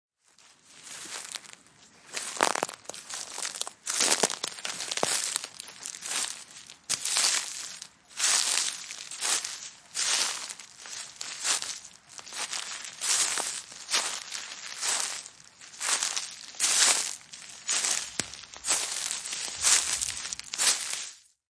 20170101 Walking on Leaves and Grass 01
Walking on leaves and grass, recorded with Rode iXY.